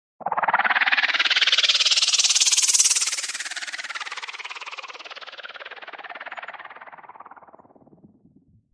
CP Insect Helicopter
A robotic flying insect? Just what we need!
flapping, wings, buzzing, insect